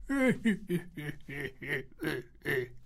weird laughter 2
evil, laugh, laughing, laughter